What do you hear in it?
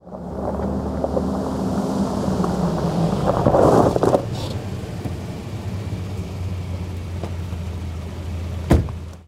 Russian military car UAZ patriot arrives and stops. Skidding on the gravel road, idling, door closing.
jeep, stop, vehicle